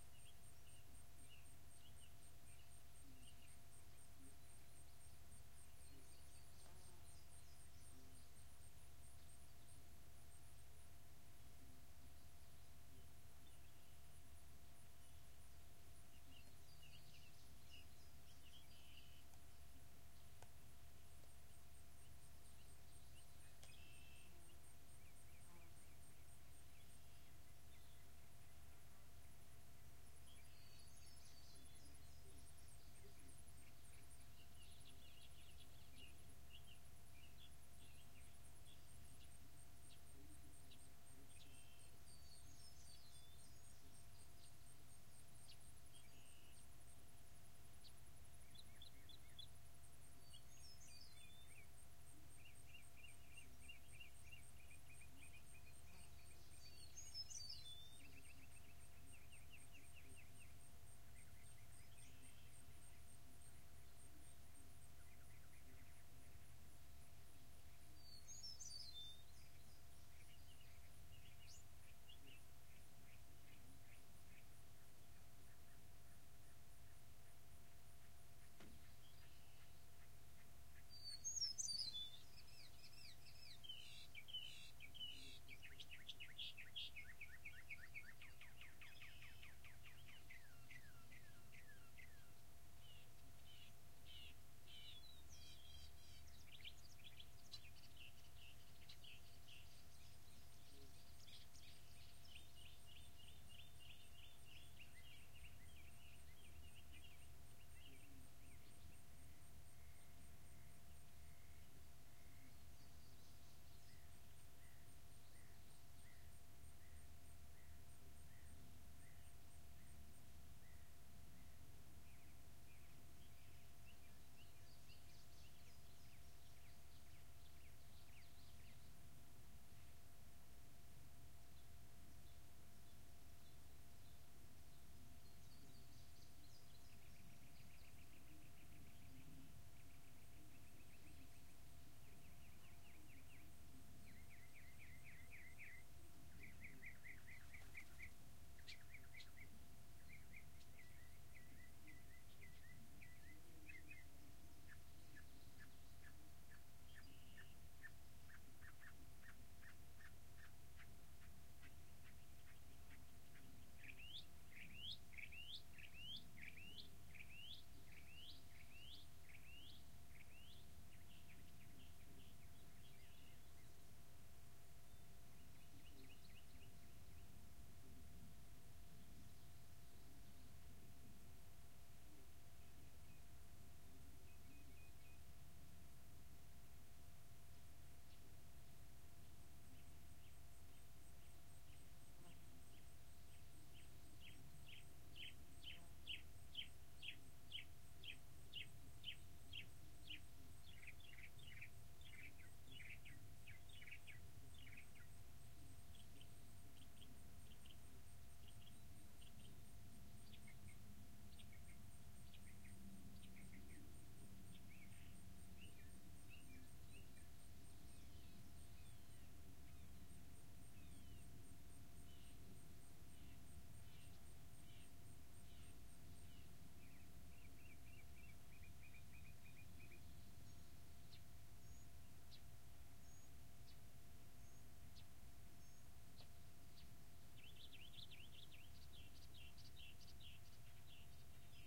Long recording of rural sounds but most notably a few different bird calls.
birds, singing, field-recording